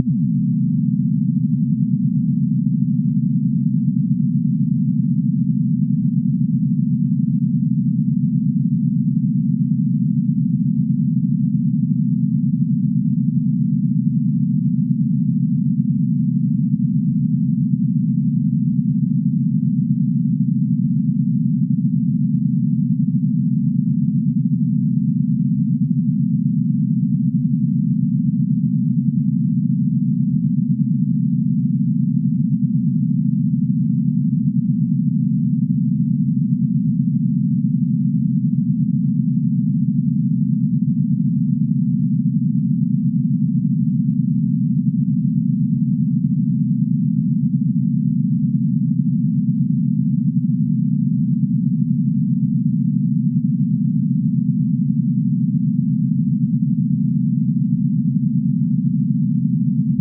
1-octave--12-semitones
Have you ever wondered how an octave of simultaneously played tones sounds like? Here it is, a 12 notes pattern (12 semitones from C to B, 12-TET logarithmic scale with A=220Hz), made of equally loud, pure sinus tones.
experiment, notes, octave, tones